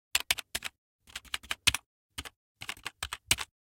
Keyboard Typing 001
Typing of a PC keyboard